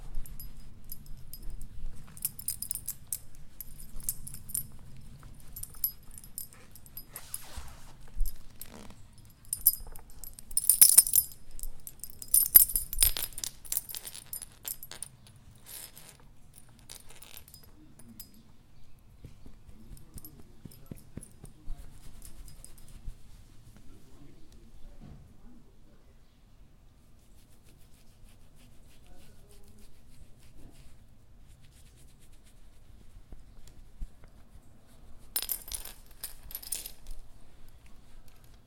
Dog collar: sounds of dog collar while pet a dog
pet, collar, animal, dog